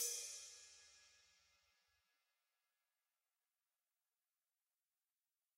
Drums Hit With Whisk